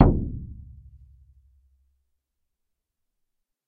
Shaman Hand Frame Drum 15 02
Shaman Hand Frame Drum
Studio Recording
Rode NT1000
AKG C1000s
Clock Audio C 009E-RF Boundary Microphone
Reaper DAW
drums, hand, sticks, frame, bodhran, shaman, percussive, percussion, shamanic, percs, drum